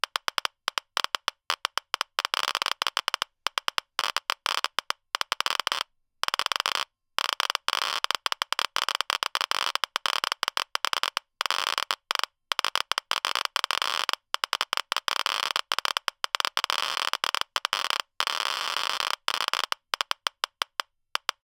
Geiger Counter Hotspot (Uneven)
Sweeping over a unevenly irradiated area with several smaller hotspots.
This is a recording of an EBERLINE E-120 Geiger counter, which makes the "classic" Geiger click sound. Recorded with a RØDE NT-1 at about 4 CM (1.6") from the speaker.
Click here to check out the full Geiger sound pack.
FULL GEIGER
DIAL
MIC TO SPEAKER
click, clicks, counter, geiger, geiger-counter, hotspot, radiation, sweeping